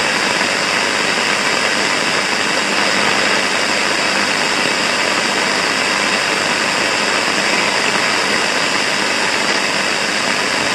am static 3
Nice quality static (no buzz!) from an MW band frequency- not exactly sure which section. Recorded from an old Sony FM/MW/LW/SW radio reciever into a 4th-gen iPod touch around Feb 2015.
noise, static, mw, am, radio, am-radio, medium-wave, amplitude-modulation